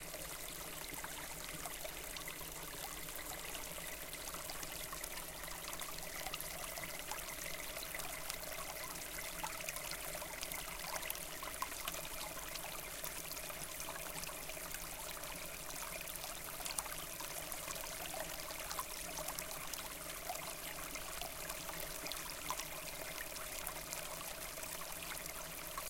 Next to a brook. Recorded with Tascam DR-05
babbling, Brook, creek, splash, stream, water